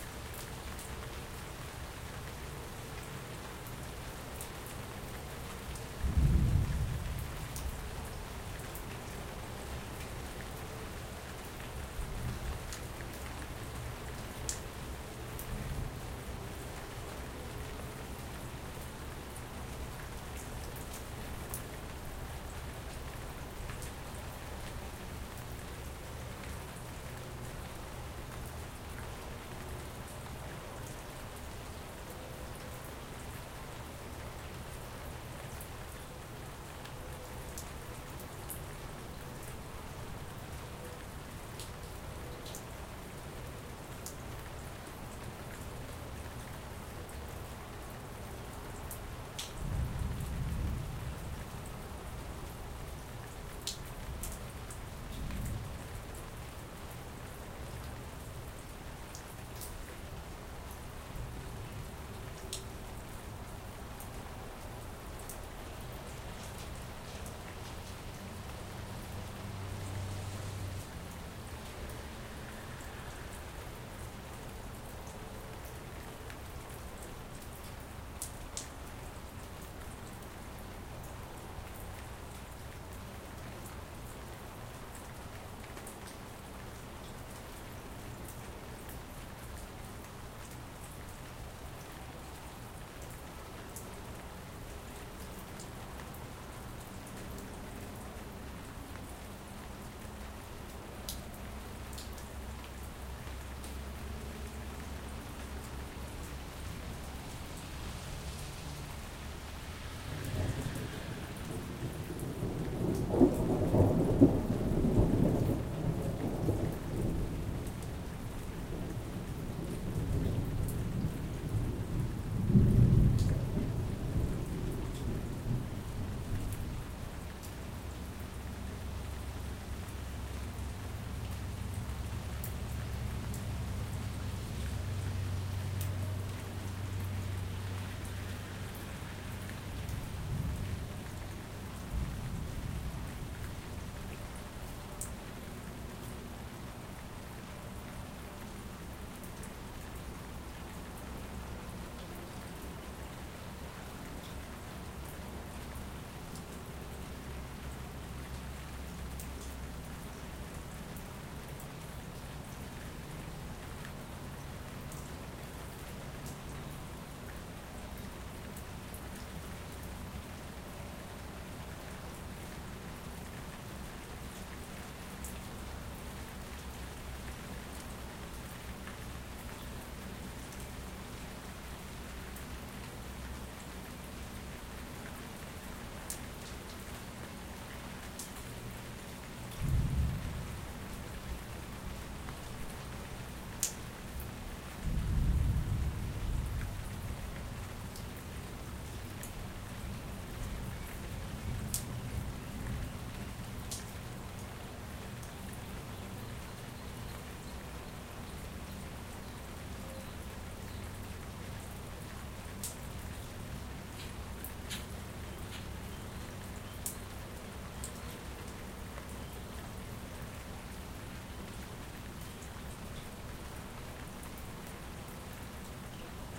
USB mic direct to laptop, some have rain some don't.
storm, field-recording, thunder